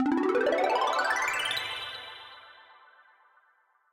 Synth pluck glissando pitch up rise
rise, pluck, Synth